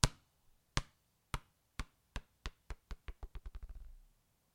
Out on the patio recording with a laptop and USB microphone. Closeup recording of a slightly flat basketball bouncing after being dropped.
field-recording, atmosphere, outdoor